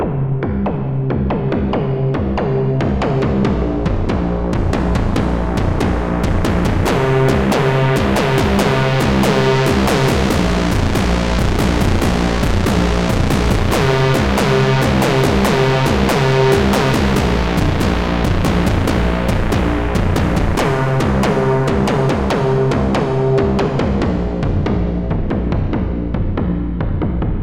rhythmic synth hits with a tone shift and swell.